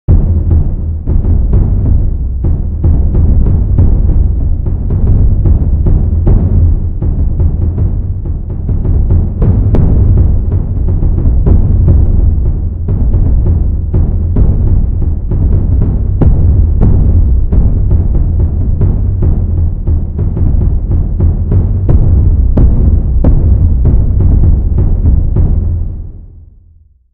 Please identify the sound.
drums 1 - fight scene
Reverbed drums for a fight scene made with Ableton and Omnisphere 2.